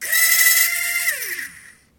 Toys-Borken RC Helicopter-21
The sound of a broken toy helicopter trying its best.
whir, motor